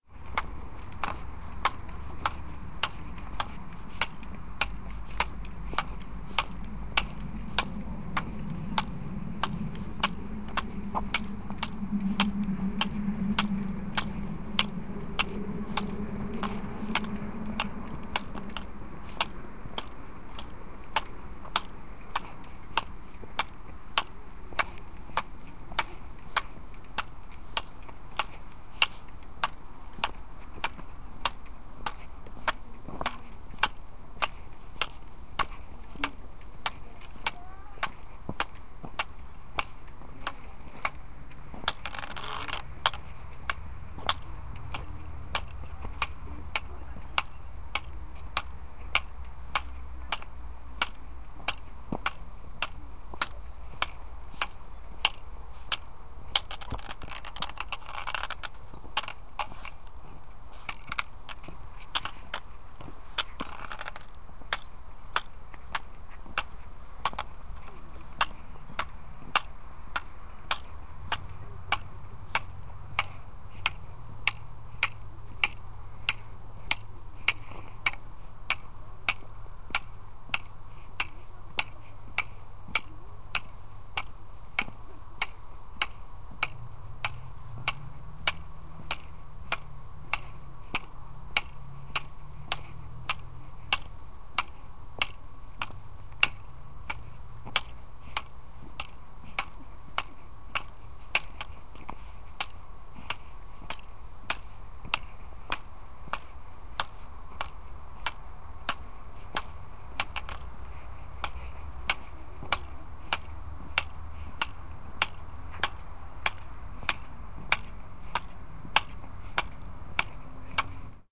white-cane
Recording of me walking using white cane - a cane for blind and visually impaired which helps to control the environment. I recorded it during the meeting of visually impaired students in Bratislava, Slovakia.